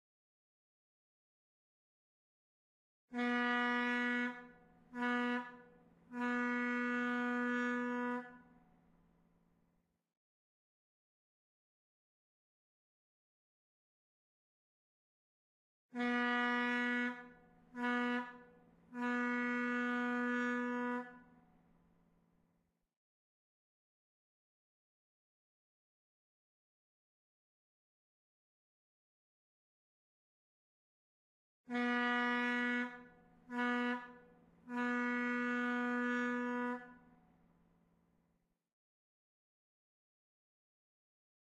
tug boat horn - sound effect
old ship horn
boat, horn, old